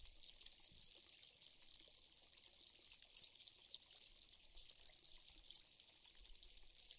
Stream with EQ
A recording of a stream with the Zoom H6 with the included XY mic. The EQ has been changed to try to make it sound more mechanical.
field-recording, nature, stereo, stream, water